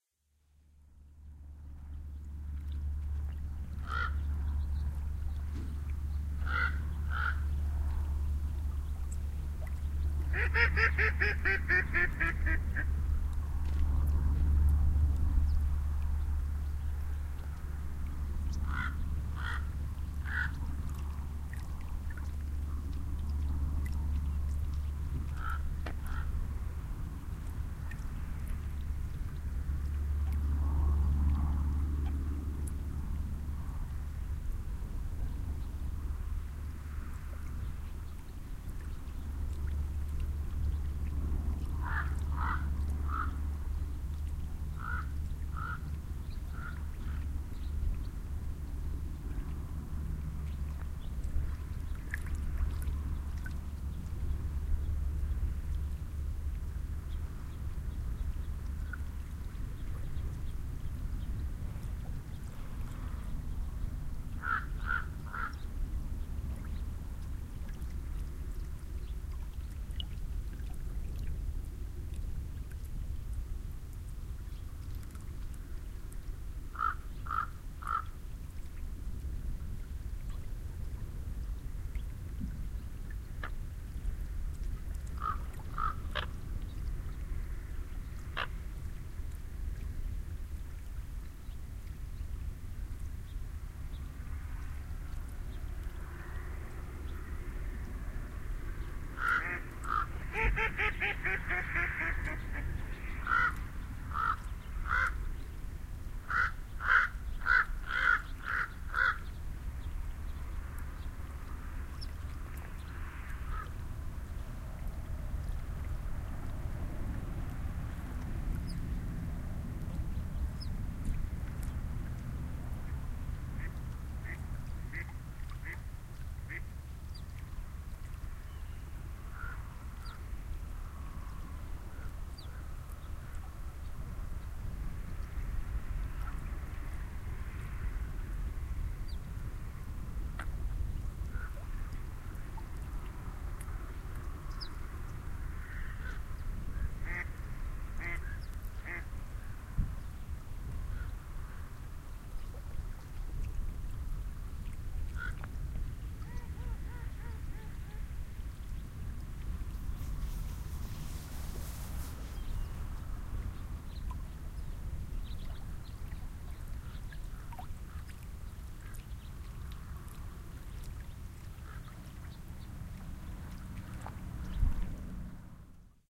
Elizabeth Lake 2 - Ducks and a Plane
An overcast December evening at Elizabeth Lake, around 5:00 PM. The sun is beginning to sink below the hills. Ducks can be heard feeding and vocalizing on the lake. Amplified in Audacity. Due to the amplification, a recording hiss is audible that I could not edit out without sacrificing some sound quality. I'll keep trying.
california, ducks, field-recording, nature